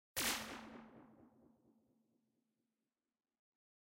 Small beams with more reverb/feedback? added effect
Have fun!